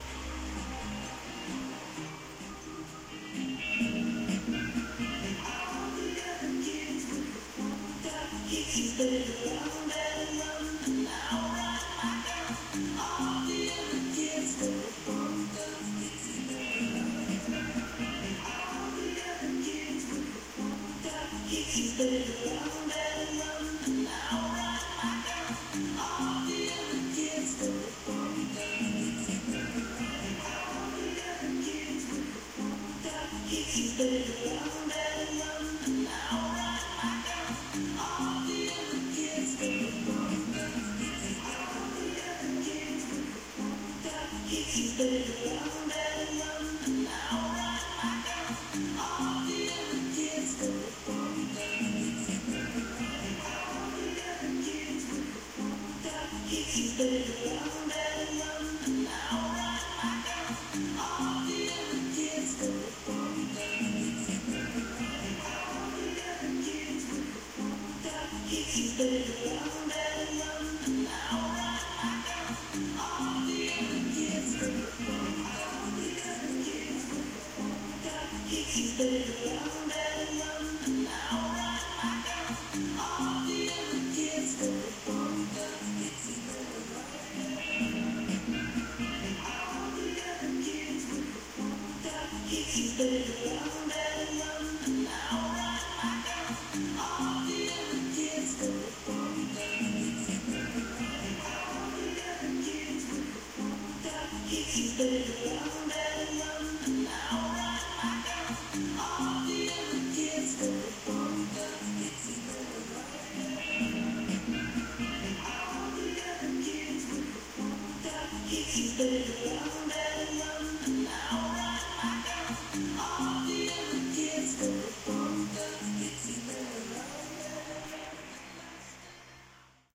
Music in room playing through Radio.
Music , in room playing through a radio. slight reverb in order to create and echo effect. Indicating the amount of space within the room.
recording-Radio, room, room-Reverb